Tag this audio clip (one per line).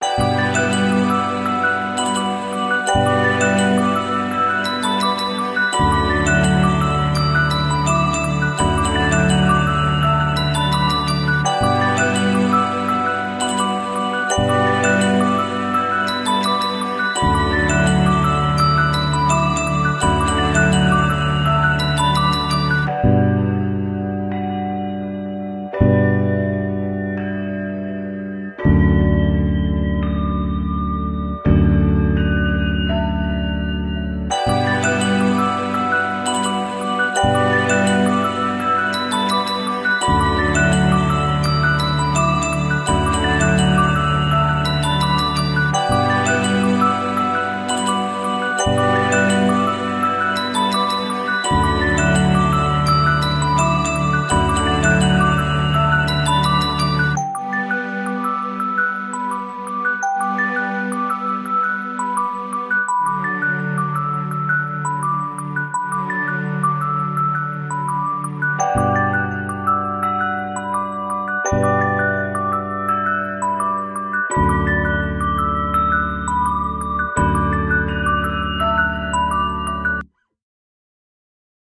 snow
Christmas